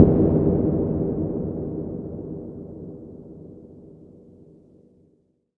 low waterdrop effect with reverb
ambient, drop, ocean, water